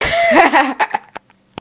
Loud Explosive Laughter
Recorded with a black Sony digital IC voice recorder.
funny, happiness, humor, joy, laugh, laughter, mirth